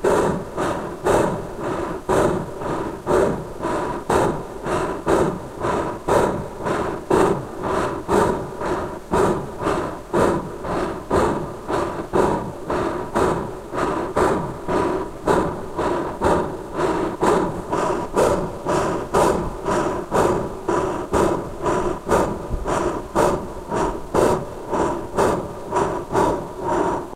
Animal Heavy Breathing
The sound of a large animal breathing heavily.
Created by modifying this sound:
Lowered the pitch and sped it up